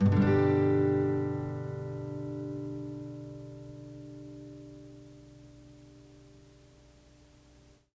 Tape Ac Guitar 11
Lo-fi tape samples at your disposal.
tape, collab-2, mojomills